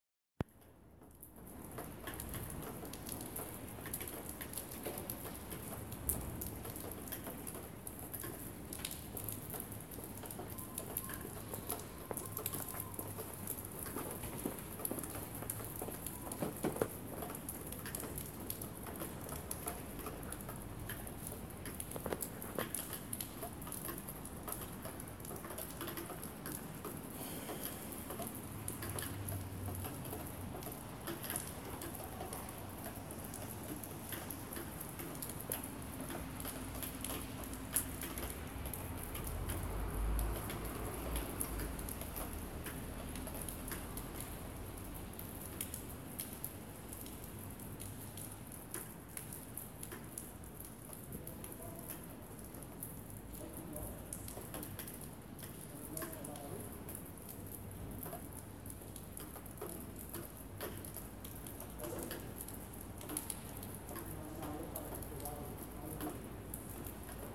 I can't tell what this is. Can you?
rainy afternoon in vienna 01
Rain in Vienna, in the City live. On afernoon
vienna, city, rainy, field-recording, outdoor, raining, austria, rainfall, rain